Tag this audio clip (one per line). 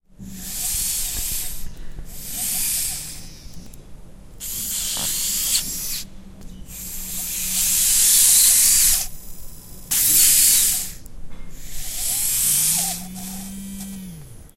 hand
motor
robot